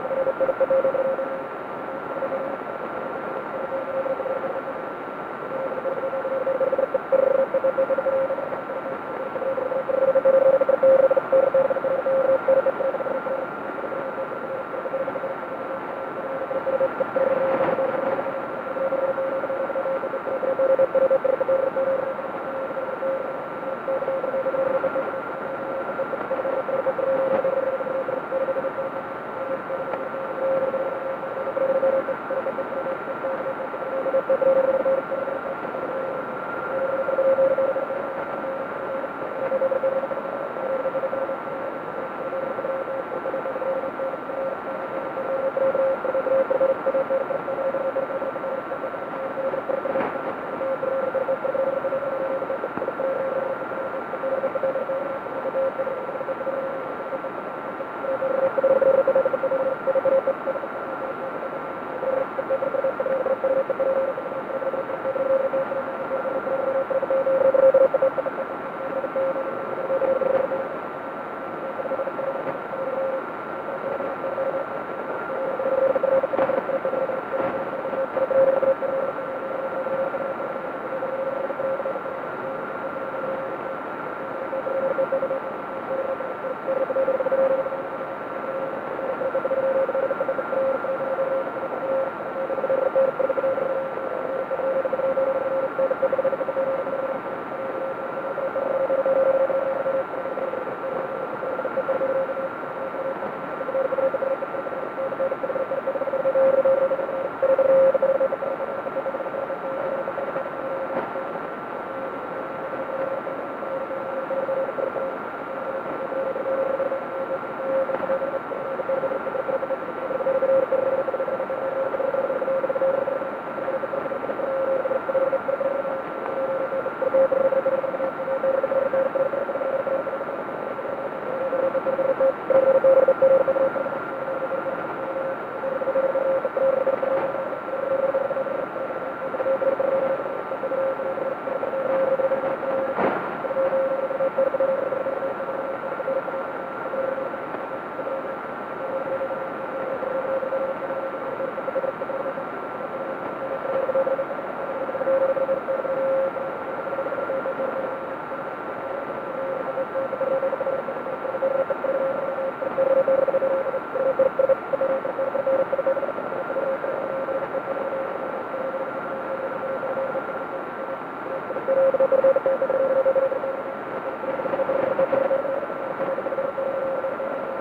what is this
Various recordings of different data transmissions over shortwave or HF radio frequencies.
radio, drone, shortwave